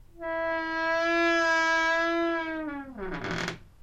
Door creaking.
Mic: Pro Audio VT-7
ADC: M-Audio Fast Track Ultra 8R
See more in the package doorCreaking
noise, creaking, door-creaking, door